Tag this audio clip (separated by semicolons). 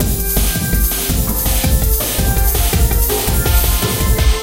acid; breakbeat; drumloops; drums; electro; electronica; experimental; extreme; glitch; hardcore; idm; processed; rythms; sliced